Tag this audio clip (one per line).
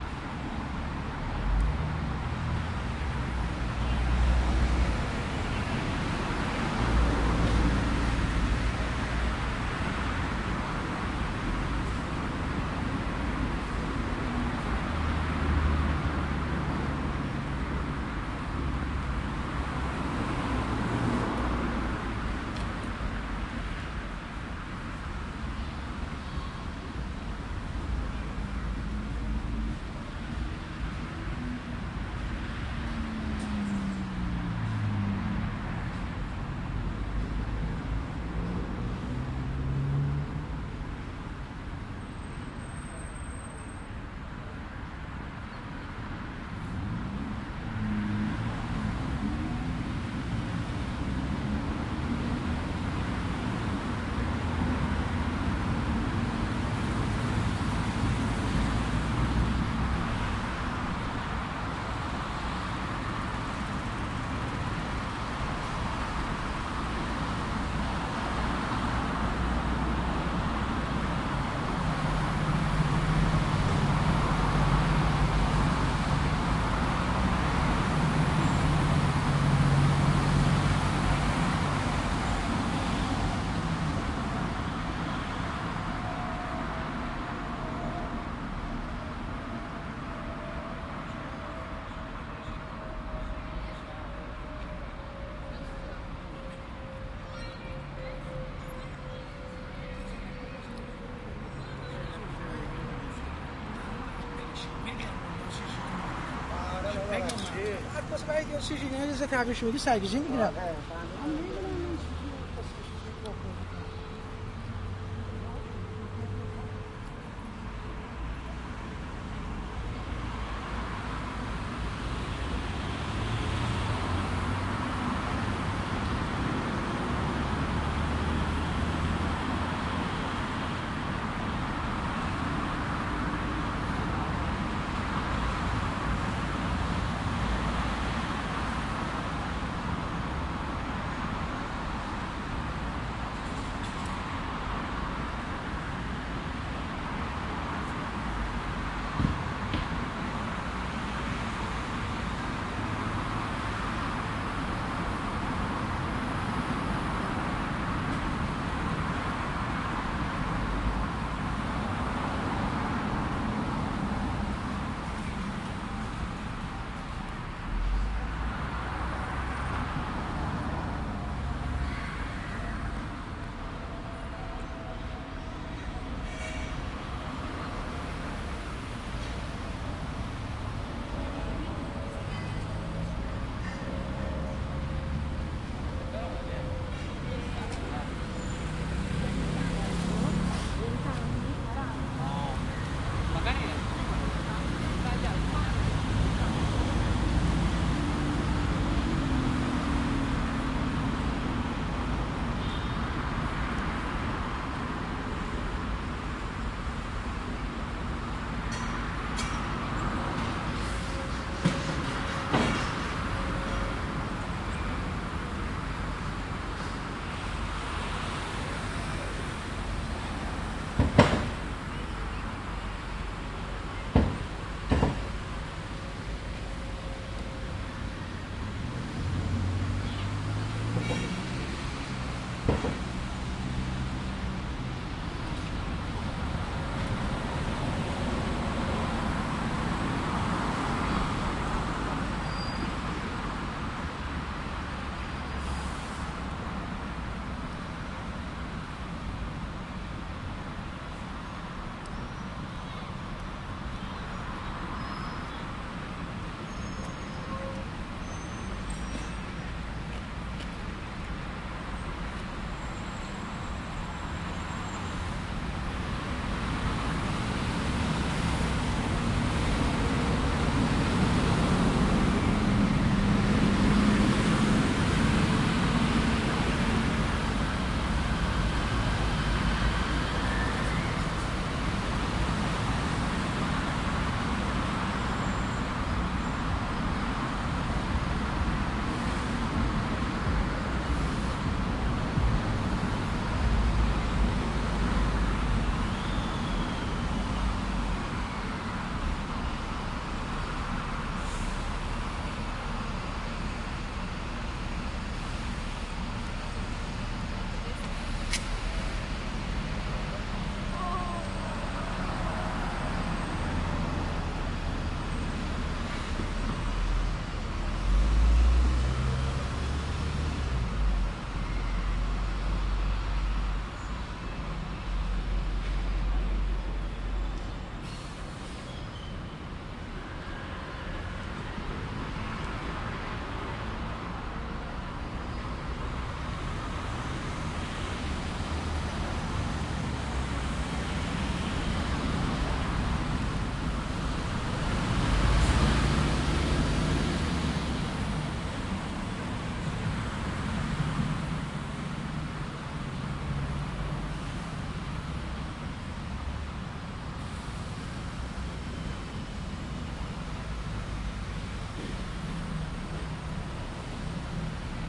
cars
common
construcion
ducketts
london
park
pedestrians
people
site
traffic